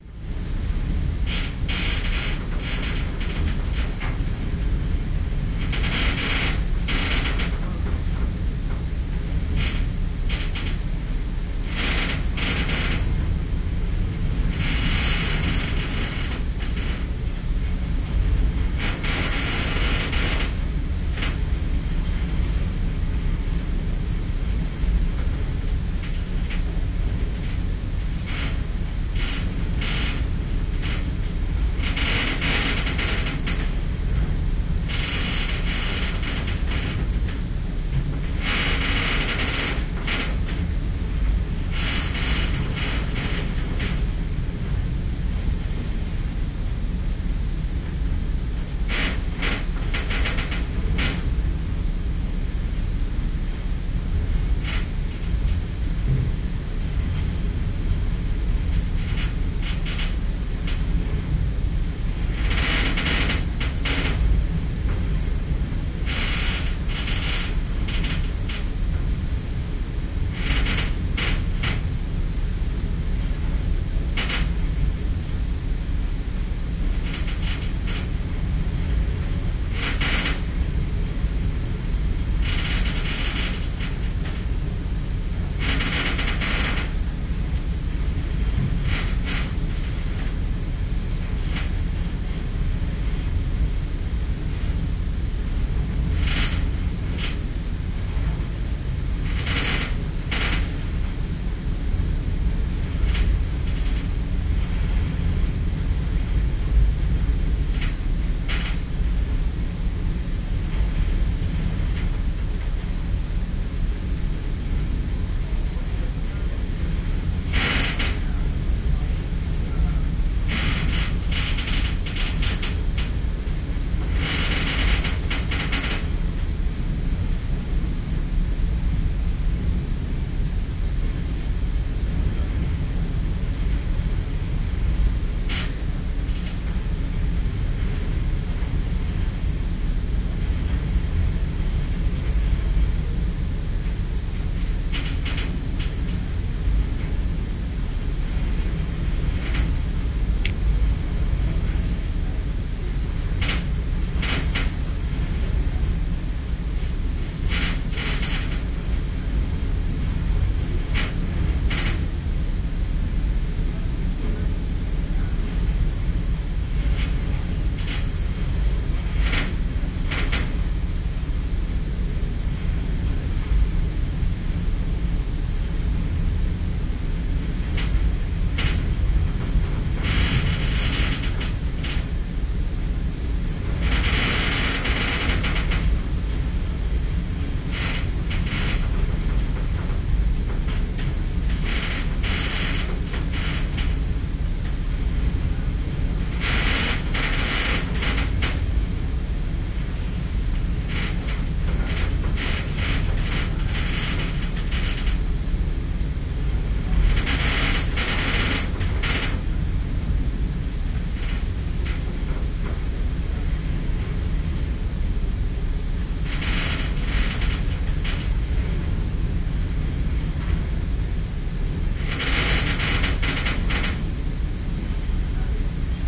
Cruiseship - inside, crew area corridor at night (metal creaking, philippinos talking in the distance). No background music, no distinguishable voices. Recorded with artificial head microphones using a SLR camera.
engine, ambiance, field-recording, voices, creaking, atmosphere, indoor, soundscape